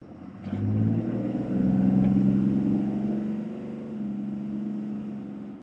Truck-Diesel 10dodge inside
diesel, inside, truck